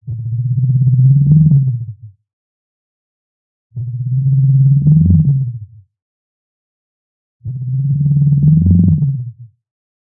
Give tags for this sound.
animal creature dinosaur growl vocalization

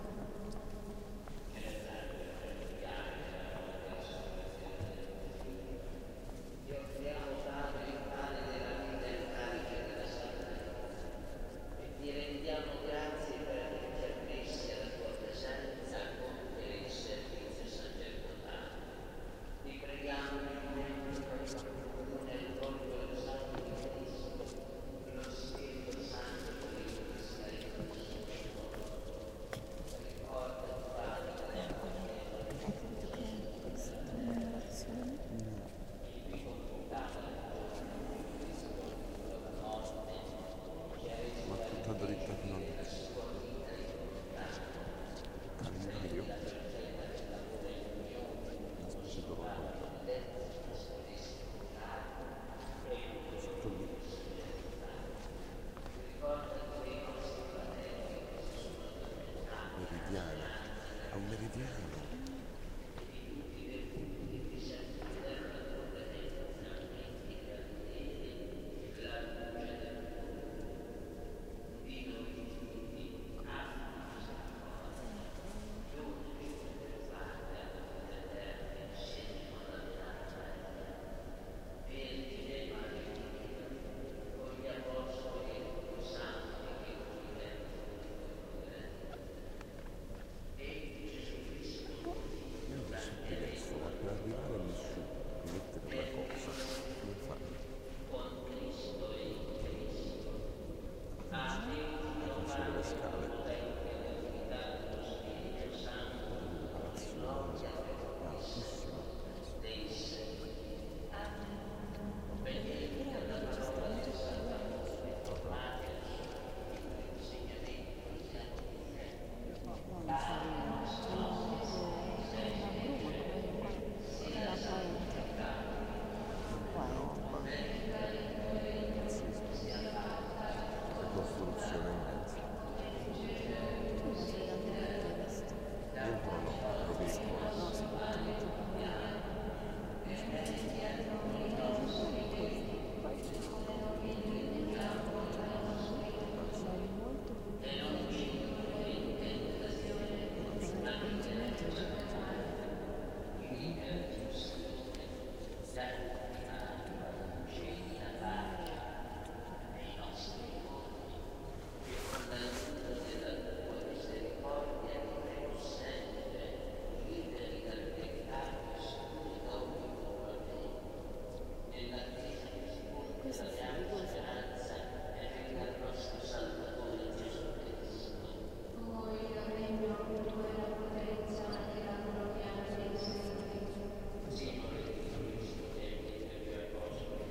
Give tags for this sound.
cathedral thin atmosphere

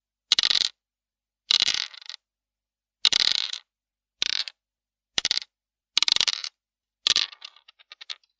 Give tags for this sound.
combination boardgame rolldice dice

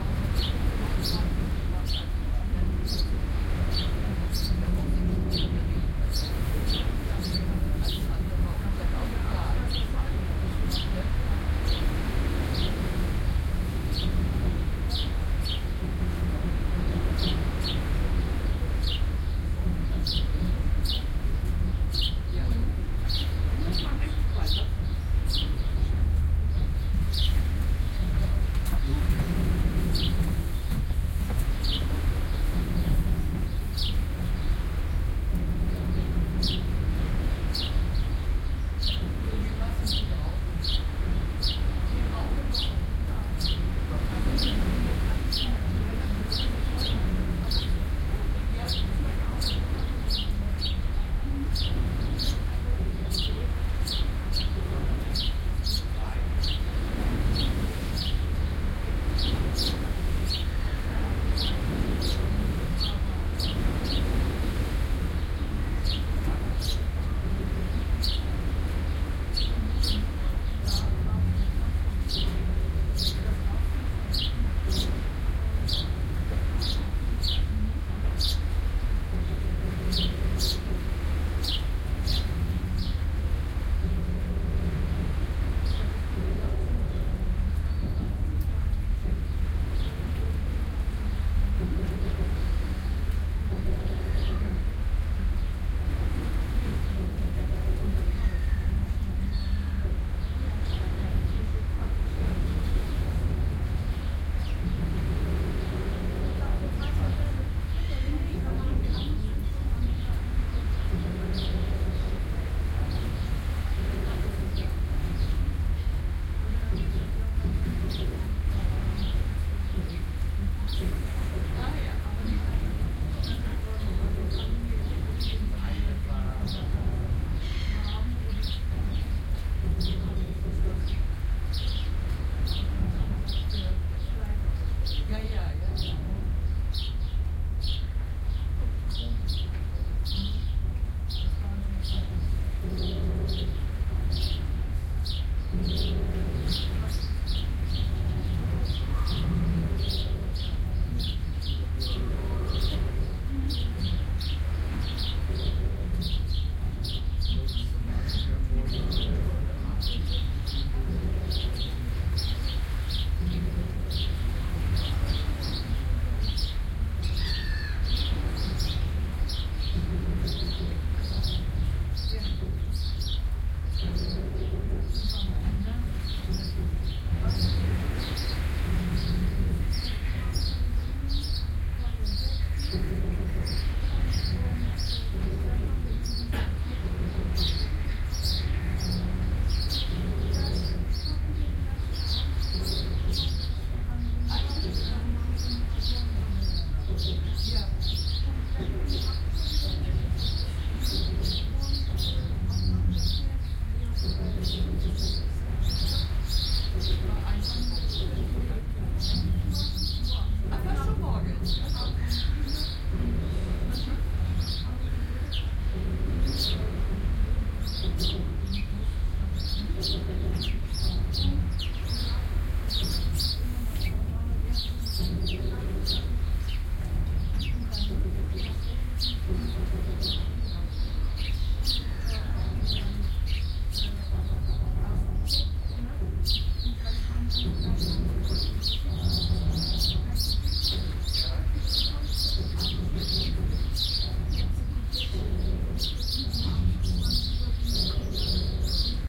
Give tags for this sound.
binaural cuxhaven field-recording people pier seaside sparrows waves

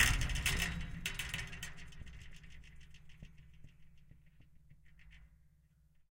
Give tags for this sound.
acoustic crash flick metalic percussive smack spring wood

